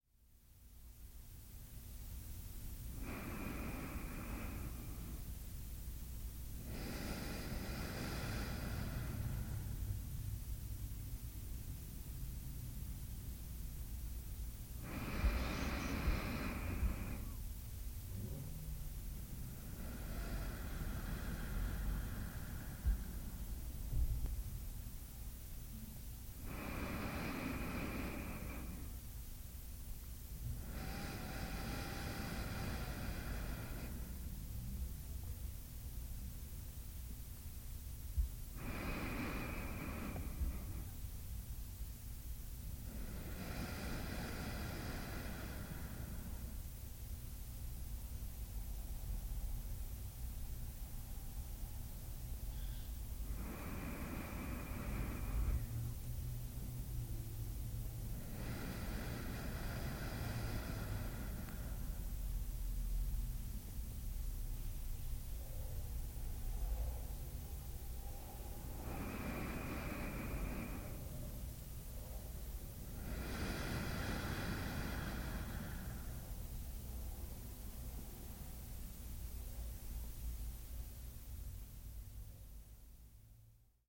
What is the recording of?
Woman taking six slow, deep breaths, mouth closed.
Deep, calm breathing.
Recorded with a Rode NTG 3 and a Tascam DR 100 in the closet, very faint traffic noise can be heard in the background.